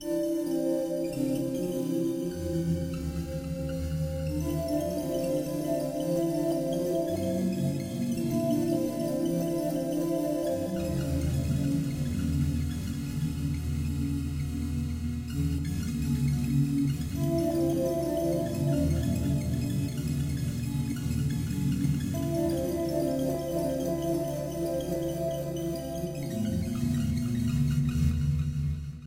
Plugin, Add Reverb, Hit Keys
Oh yea..... don't forget to hit the record button before doing all that.